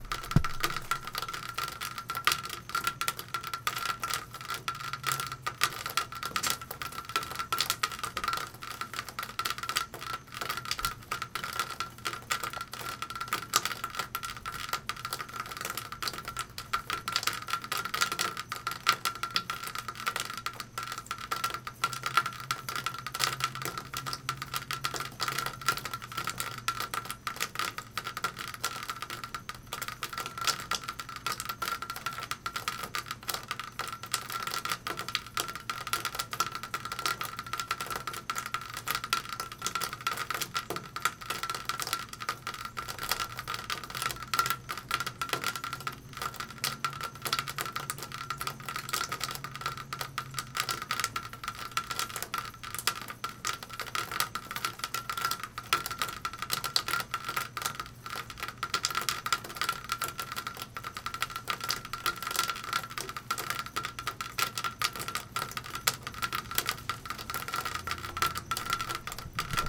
Rain Gutter Downspout
Water running down a gutter downspout hitting the bottom.
Recorded with a Zoom H2.
drops, rain